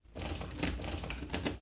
Empuñando arma 2
holding and reloading gun